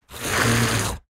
A monster voice